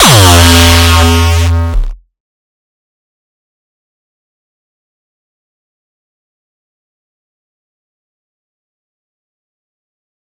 The Beast - D5
A hardcore kick as part of my pack The Beast
bassdrum, core, distorted, frenchcore, gabber, hard, hardcore, hardstyle, kick